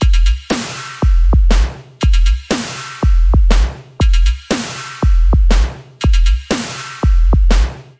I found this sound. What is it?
Deep Kick C 120bpm
Tags: deep bass drum 120 120bpm loop beats rhythm
My Music
deep, rhythm, beats, drum, 120bpm, bass, 120, loop